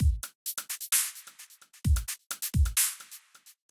chillout beat
An ambient drum loop created on flstudio, hats have added delay
130-bpm, ambient, beat, chill-out, drum, loop